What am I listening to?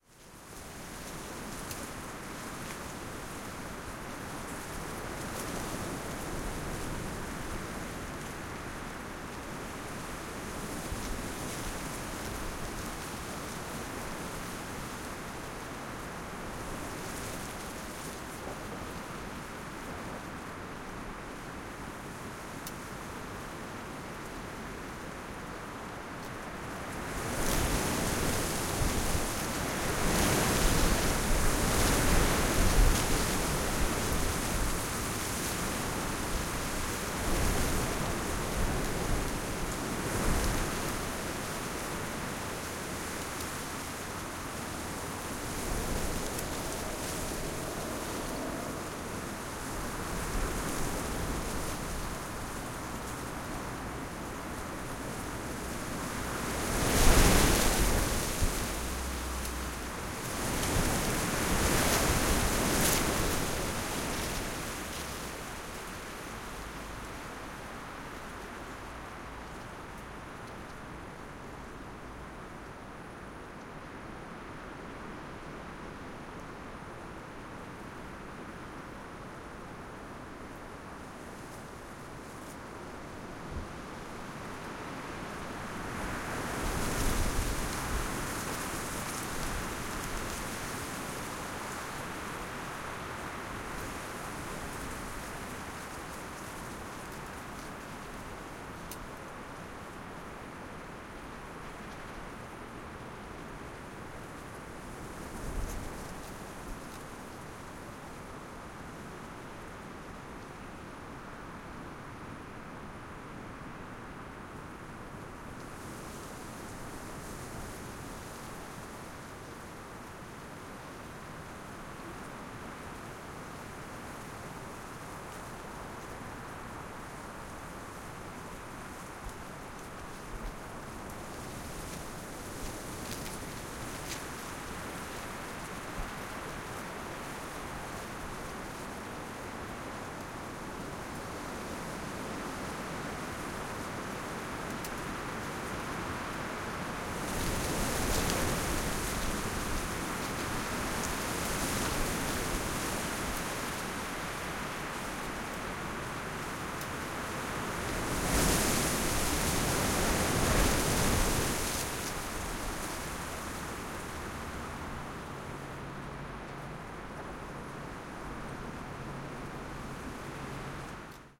close up of leaves/foliage moved by strong gusts of "mistral".From various field recordings during a shooting in France, Aubagne near Marseille. We call "Mistral" this typical strong wind blowing in this area. Hot in summer, it's really cold in winter.
france, strong, foliage, gusts, wind, mistral, aubagne, leaves